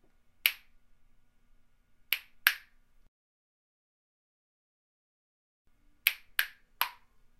Wooden Spoons 2
percussion world hits wood Appalachian hit